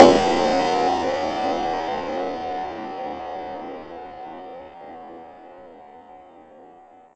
Freak Snare
Time stretched percussion sound, processed with audacity.
stretch, time